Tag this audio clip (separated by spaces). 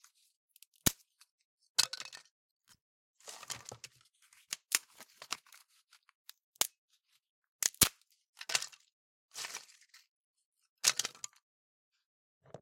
drop Wood snap small